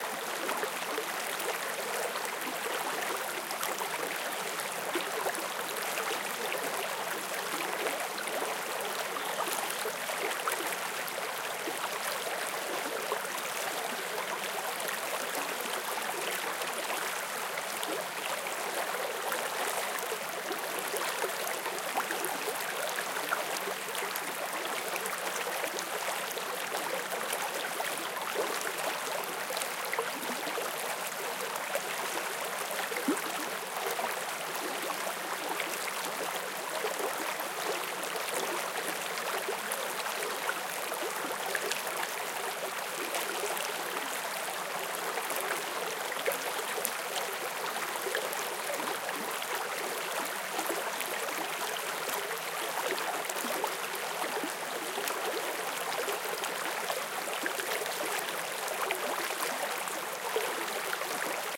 Small Creek (Close-Miked)
A binaural, close-miked recording of a small creek with a bit of distant bird chirping. Recorded with a Zoom H4n.